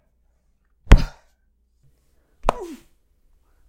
These are two examples of being punched and making a sort of "oof" noise when the air gets knocked out of you.